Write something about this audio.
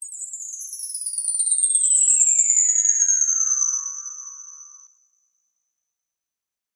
Bar Chimes V5 - Aluminium 8mm - wind
Recording of chimes by request for Karlhungus
Microphones:
Beyerdynamic M58
Clock Audio C 009E-RF
Focusrite Scarllet 2i2 interface
Audacity
bell, chime, chiming, metal, ring, wind-chimes, windchimes